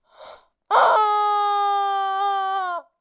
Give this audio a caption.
rubber chicken05
A toy rubber chicken
screaming, honk, honking, scream, cartoony, toy